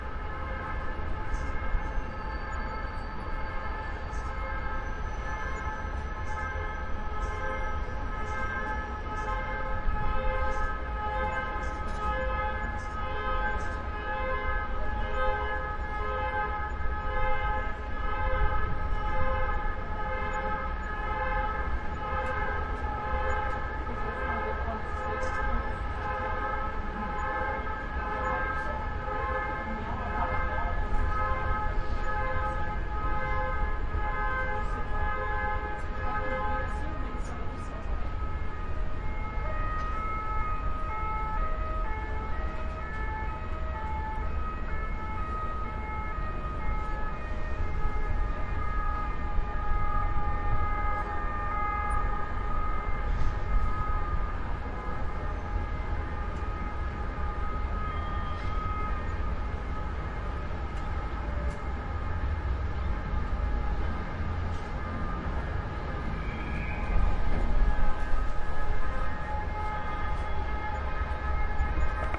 city ambiance from cathedral tower
This recording is done with the roalnd R-26 on a trip to barcelona chirstmas 2013
ambiance, ambience, ambient, atmosphere, barcelona, city, field-recording, soundscape